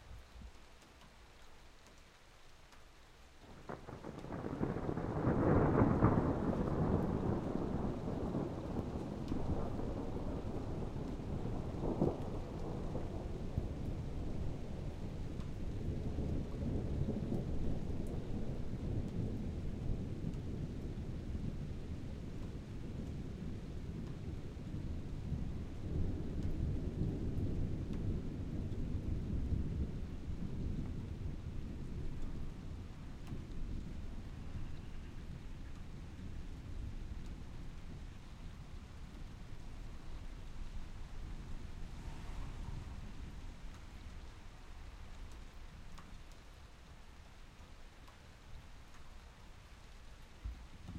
Thunder&Rain2
Big storm in West Yorkshire, England. Recorded on a Rode Condenser Microphone using a Fostex Field Recorder. NO AUTO GAIN!
uk, weather, field-recording, thunderstorm, lightning, thunder-storm, england, storm, rain, thunder